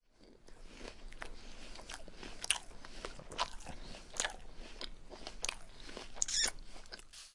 Apple Chewing Slurps
Loud and obnoxious open mouthed apple chewing with a lot of good salivary inner mouth reverberation. You can really hear a terrific slurp towards the end. Recorded in a hifi sound studio at Stanford U with a Sony PCM D-50 very close to the source.
aip09, apple, biting, chew, chewing, delicious, golden, hifi, loud, open-mouth, rude, saliva, slurps, tounge